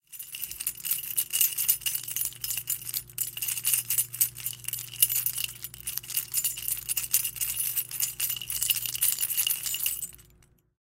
Sounds like "cling cling cling!"